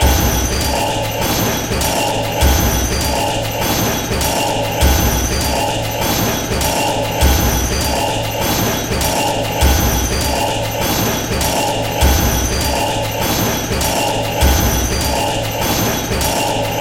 Factory machine 002
Sound design elements
Perfect for cinematic uses,video games.
My custom sample recorded from the field.
Recording gear-Zoom h6 and microphone Oktava MK-012-01.
Cubase 10.5
Sampler Native instruments Kontakt 6
Audio editor-Wavosaur
buzz, buzzing, drill, engine, factory, generator, hum, industrial, loud, machine, machinery, mechanical, metal, mill, motor, operation, power, run, running, saw, sfx, sounds